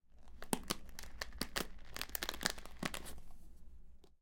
comer, masticar, plantas
comer, masticar, plantas